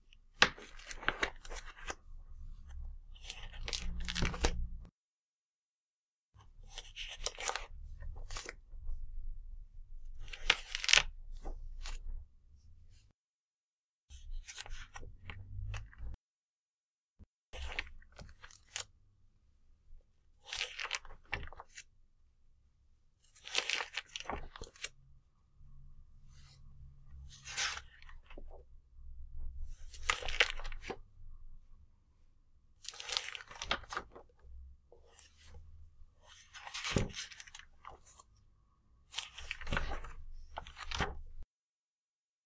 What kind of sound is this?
Turning plastic sheet 1
Turning plastic pages.
{"fr":"Tourner des pages plastifiées 2","desc":"Manipulation de pages plastiques.","tags":"plastique plastifée page feuille feuillet"}
plastic, fold, pages